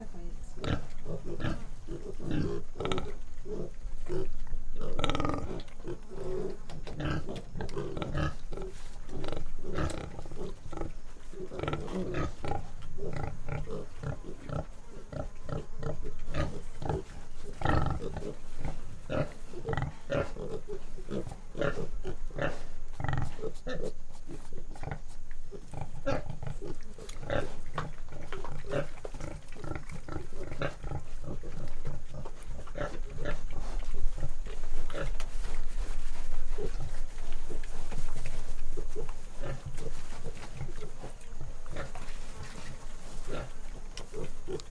big pigs 2
pig, countryside, farm